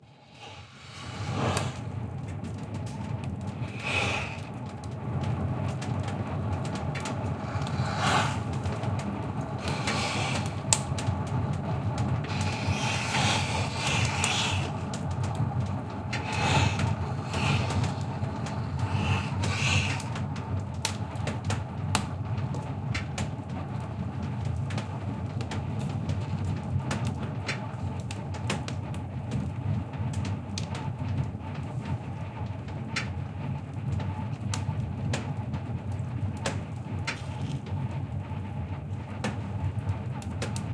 Furnace Burning
burning, stove, Furnace, ignite, fire